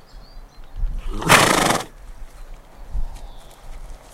horse snort 2
A noisy snort from a horse which came to investigate my field recording antics. Recorded with minidisc.